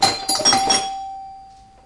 Door Bell 02
alert, bell, door, doors